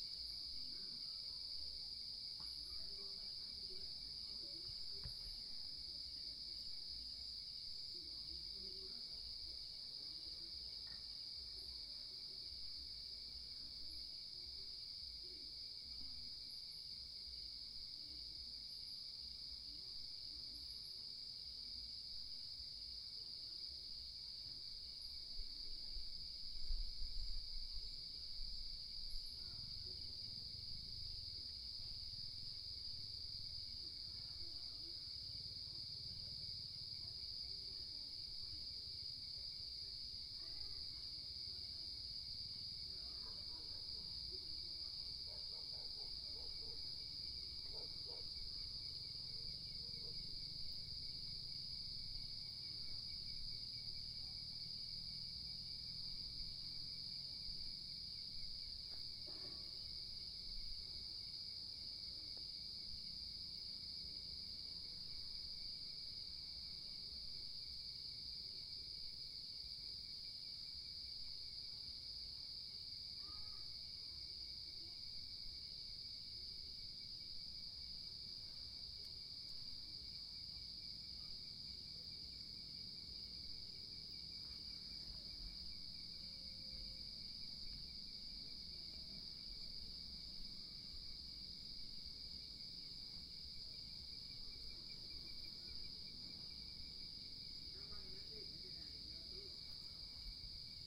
Hmong Village at Night
In visiting a Hmong village I had the opportunity to record a few different things. It was a nice cool evening in this mountain village when I recorded this.
quietness, ambiance, night-time, field-recording, relaxing, mountains, boonies, crickets, quiet, thailand, summer-sounds